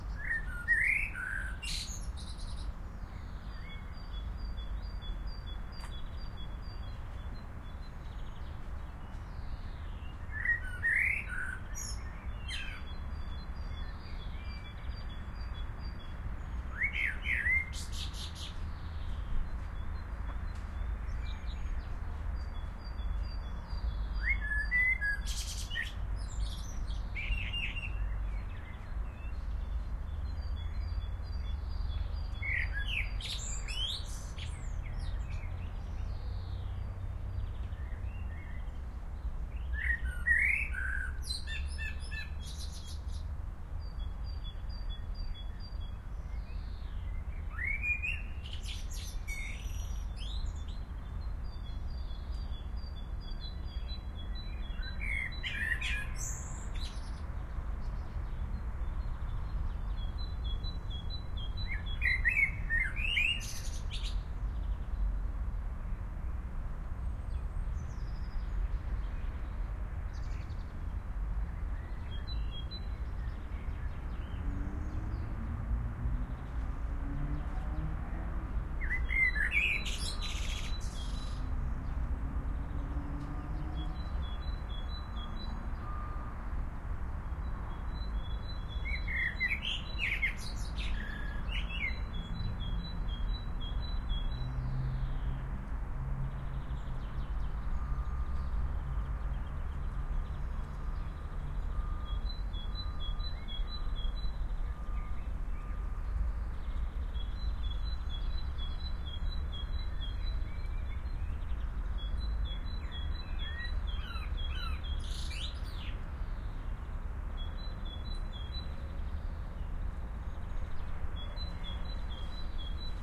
Blackbird botanical gardens Aarhus
This blackbird was singing in the Botanical Gardens in Aarhus / Denmark, which are right next to the city centre and contain plants and trees from all over the world. There are some city noises, but its song is magic. Shure WL183 microphones, FEL preamp into R-09HR recorder.
field-recording, aarhus, birdsong, city, blackbird, spring, denmark